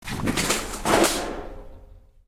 Metalic splash
hit, metal, impact, splash, woosh, Metalic